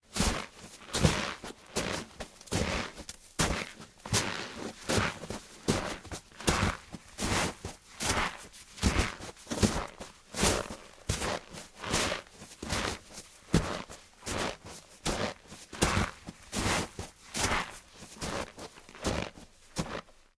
Foot steps in snow
footsteps, walking, snow, steps, Foot